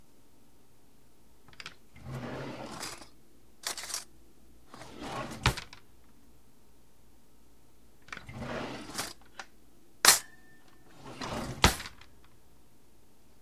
open drawer get fork then put back
this is my silver ware drawer opening then closing. it was recorded with a sennheiser e835 dynamic microphone, and a behringer tube ultragain mic100 preamp.
close, fork, drawer, open